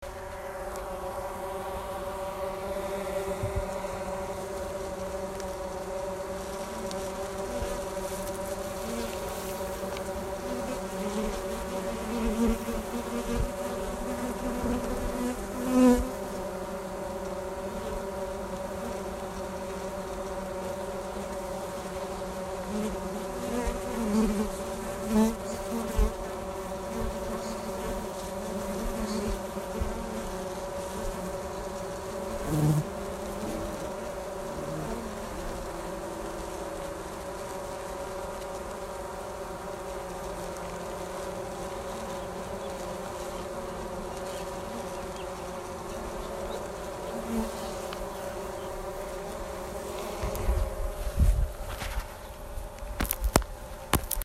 Bees fanning on a top of a bee hive cove